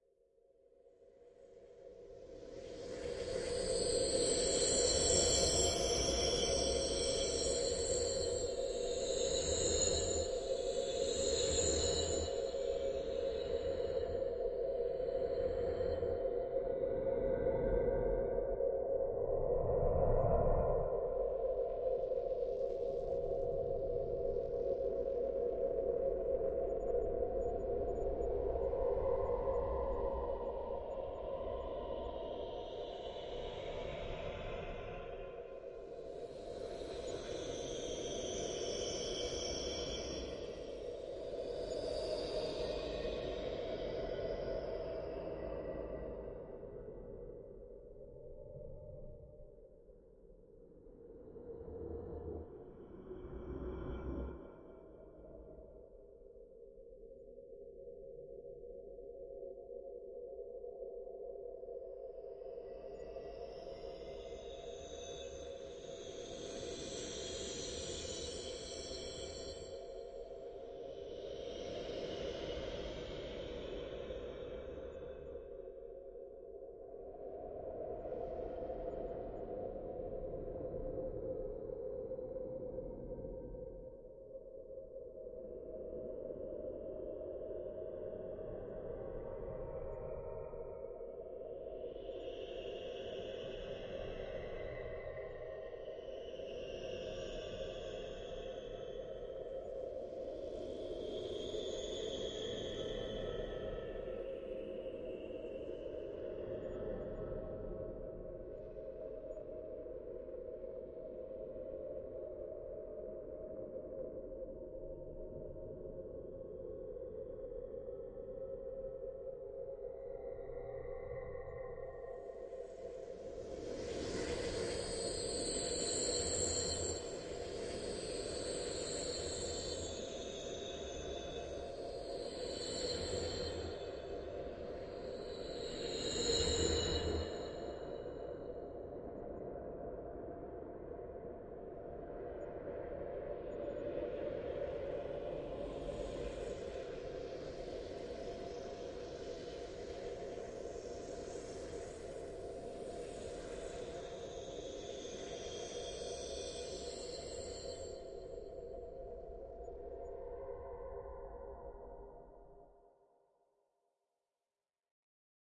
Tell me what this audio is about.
Drone airy satan screech eery
this is part of a drone pack i am making specifically to upload onto free sound, the drones in this pack will be ominous in nature, hope you guys enjoy and dont forget to rate so i know what to make more of
horror, satan